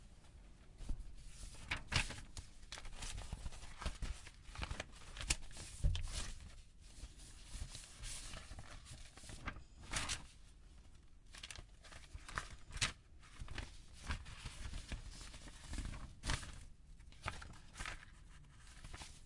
Rustling, rattling paper. Mono recording.